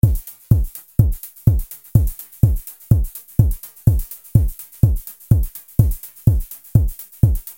Drum Beat created & programed by me and slightly processed.Created with analog drum synthesizers with Buzz.